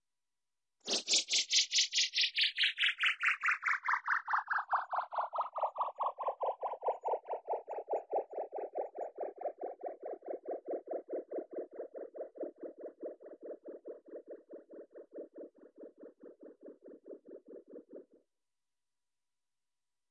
falling bubbles
Bubble sound loop with pitch bend.
bending, bubbles, falling, liquidy, squishy